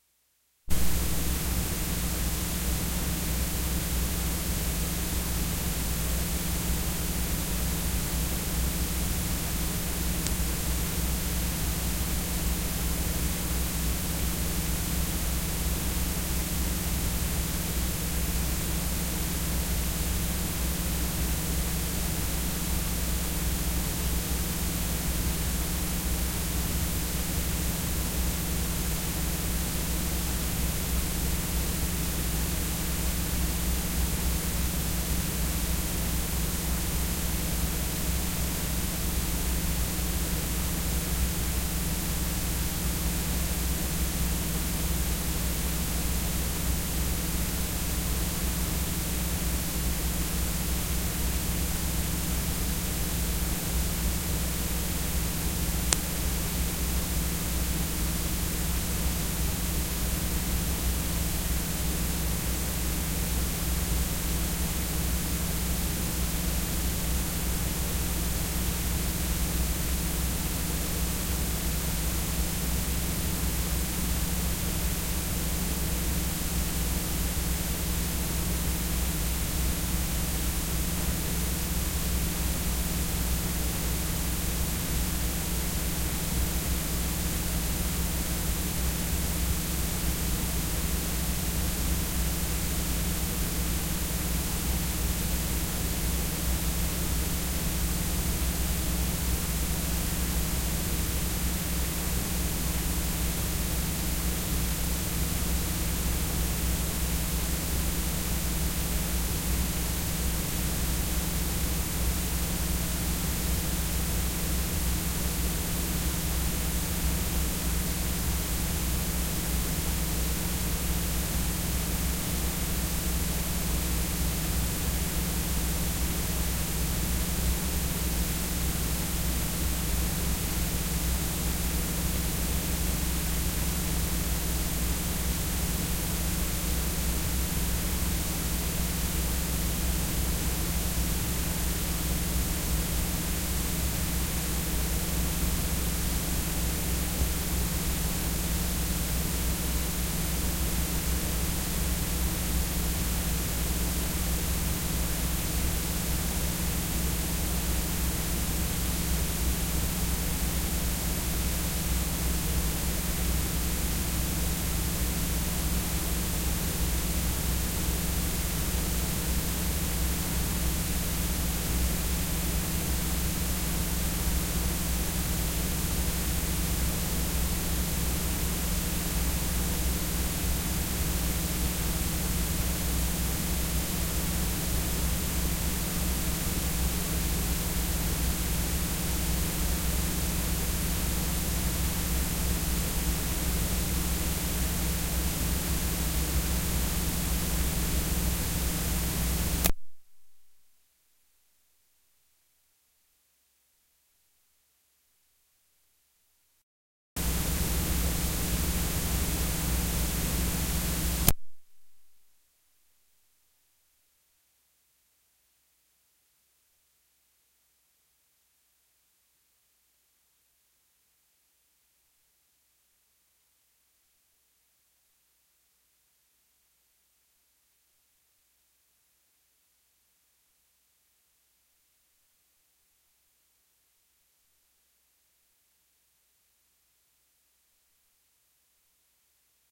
cassette tape hiss and ground hum white noise static full level +switch on, off
switch, ground, white, static, off, tape, hiss, noise, cassette, full, level, hum